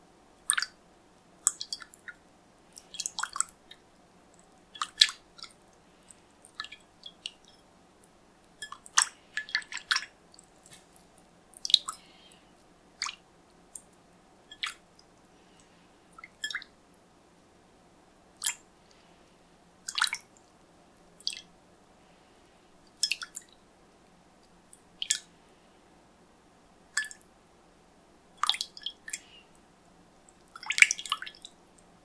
gentle spashes
Gently splashing water around in a shallow ceramic dish as if rinsing something off
Recorded on a CAD U37 microphone into Audacity. July 2018
ceramic, dish, foley, liquid, rinse, splash, splish, wash, water